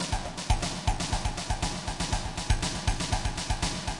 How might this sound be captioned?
drum loop plus pretty cool ring mod and tape delay